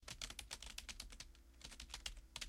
when you write in your computer.